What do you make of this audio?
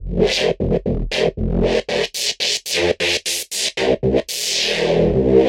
Reece bassline Mono